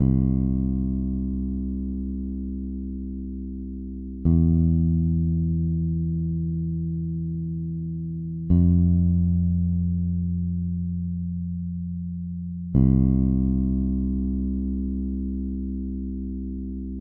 A simple and easy bass loop i created!
i used this bassloop in several of my songs as a reverse element!
So the only work you need to do is reversing it or keep it like this!
enjoy!